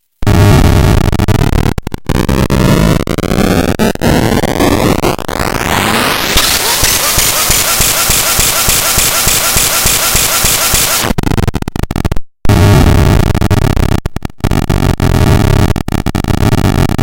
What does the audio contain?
Engine ignition sound from circuit bent toy
bending, circuit, circuitbending